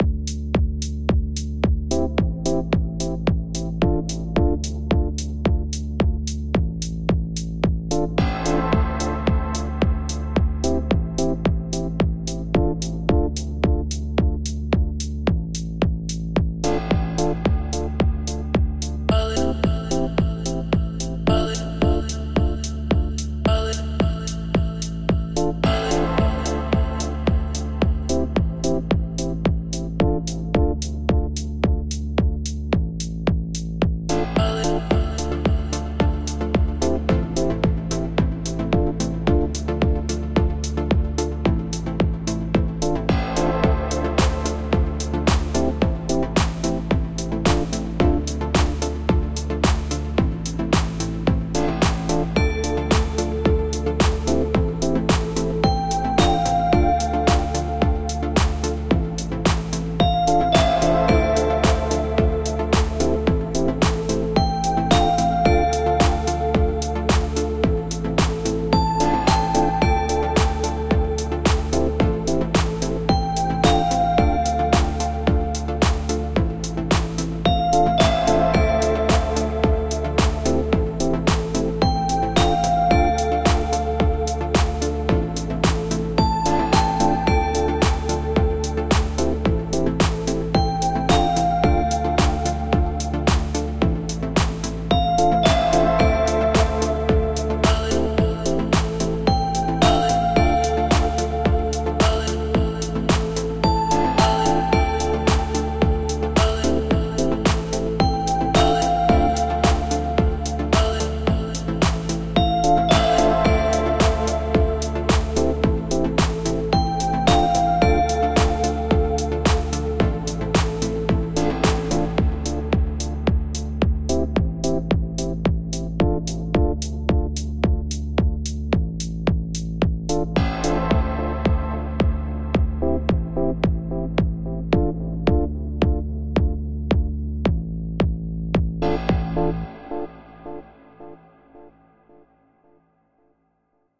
Nebula -techno house minitrack.110Bpm.